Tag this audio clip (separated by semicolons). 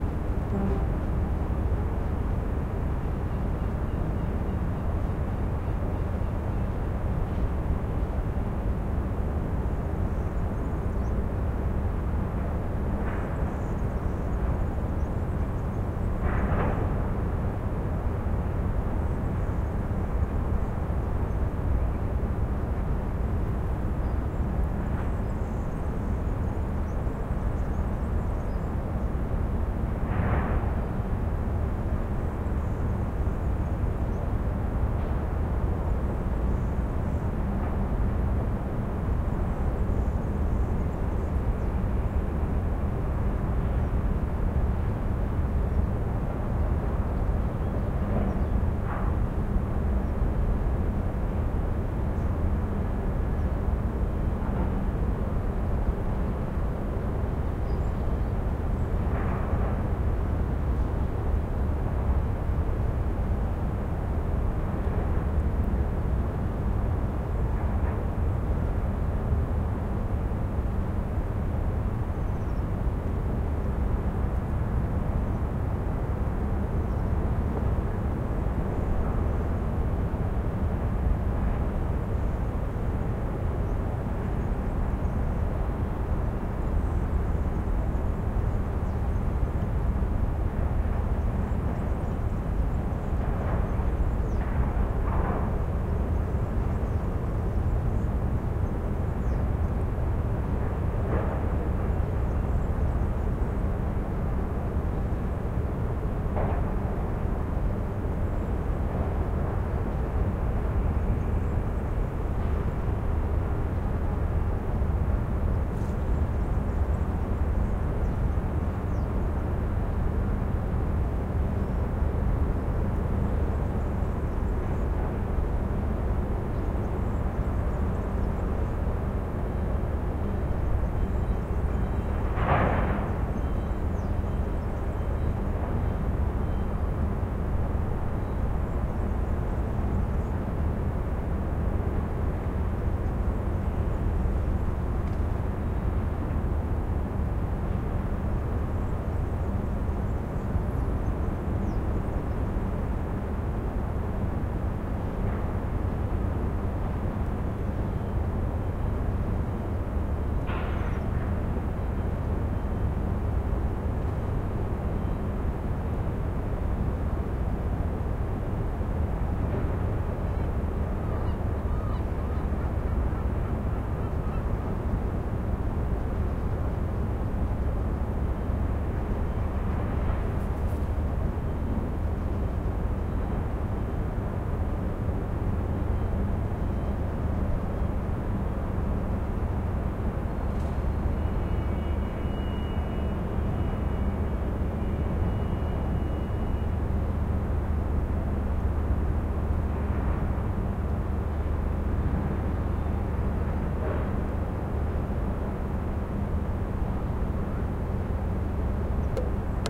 barcelona; field-recording; exterior; port; ambience; atmosphere; ambiance